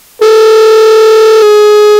another freak tone on a phone